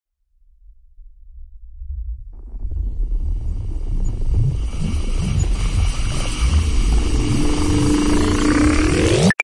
An unsettling, creepy buildup to a sudden, innocent finish.
Created using sampling and granular synthesis.
buildup, composite, experimental, soundscape, unsettling